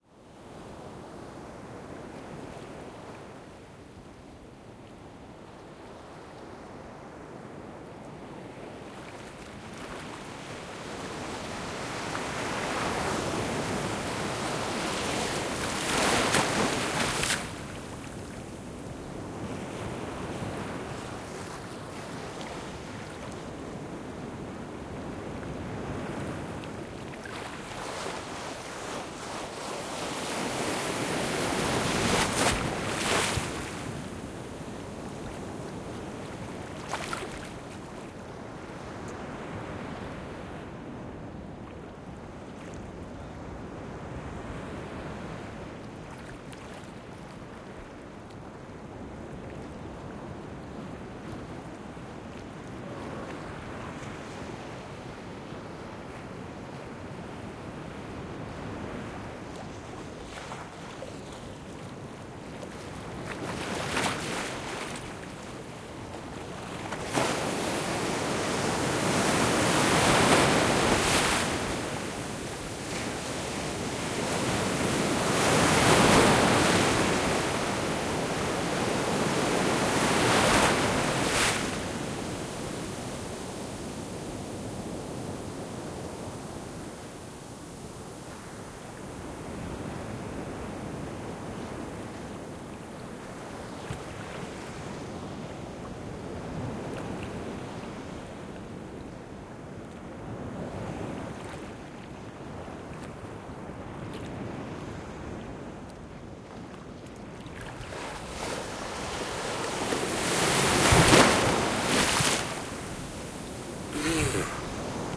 ocean waves surround me
I was standing in the ocean during the recording
ZoomH2N
Name me if You use it:
Tamás Bohács